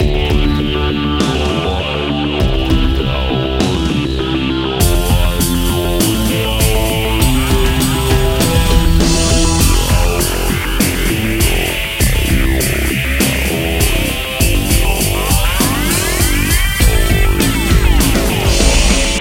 Zero Logic
A short loop cut from one of my original compositions. 100 BPM - Key of D. Full Song Here
100, Bass, BPM, D, Drums, Loop, Music, Robot, Synth, Voice